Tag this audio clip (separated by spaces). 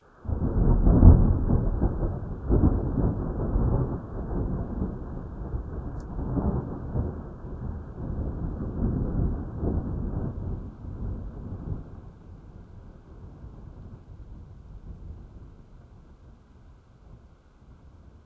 west-coast lightning thunder storm weather north-america ambient thunder-clap thunder-roll field-recording